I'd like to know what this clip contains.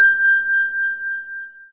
Took the end of the ringer from this sound:
Faded it out in Audacity to create a little bell ping.
706, 80341, bell, bt, ding, hyderpotter, phone, ping, ring, ringing, ringtone, telephone, tiny